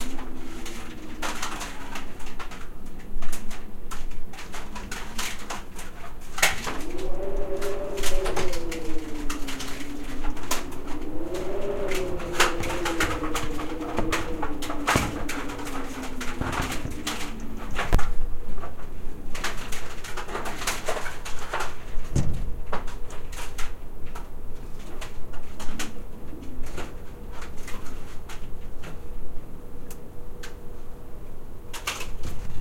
Chris' window noise
The sound of the wind howling against the window pane in my friends apartment. Plastic blinds can be heard striking a window pane on the other side of the room.
sound-effect, atmosphere